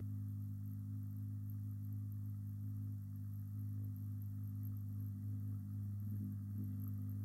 Plane Buzz
Sound of old plane working in the sky.